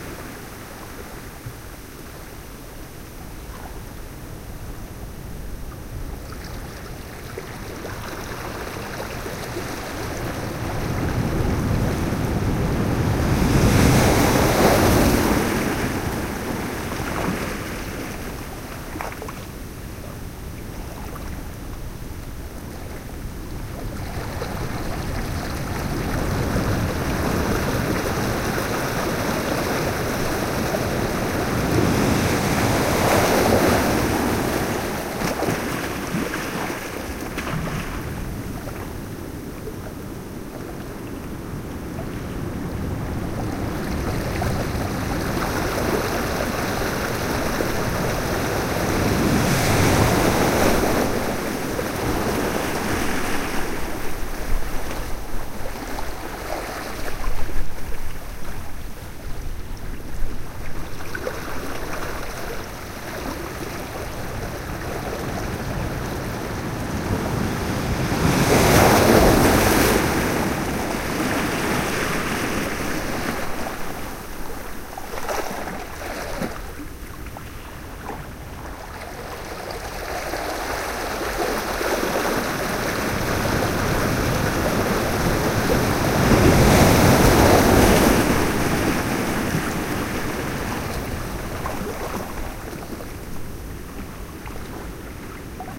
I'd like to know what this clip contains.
Ocean waves at Point Reyes.
oceanwaves-6&7 are from different parts of the same recording and edited to be combined and looped.
water, ocean, loop, close, slosh, splash, waves, sea, Point-Reyes, seashore, field-recording